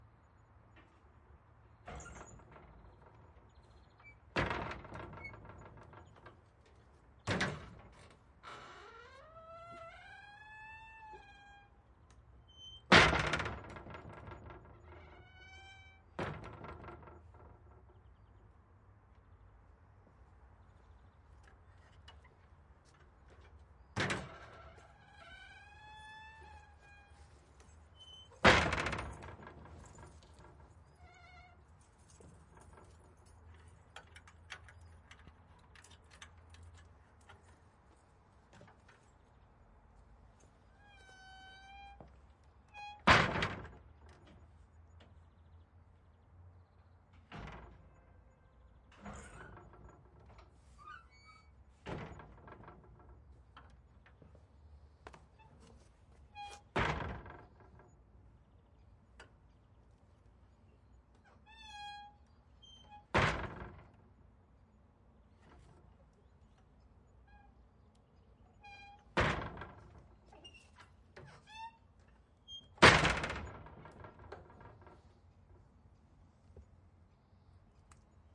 BIG WOODEN DOORS WITH GLASS INSERTS
Big wooden doors of a greenhouse, orangery opening and closing. Recorded with SD 788t and MS Stereo mic Oktava MK-012 M/S
wooden, doors, open